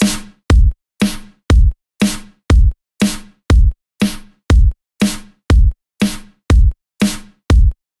Thorns to the Beat
Simple Drum loop made in ACID Pro with Waves Platinum